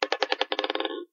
Plastic Ball 7
A plastic ball dropped on my wooden desk. Seemed like somewhat useful samples.
concrete, hit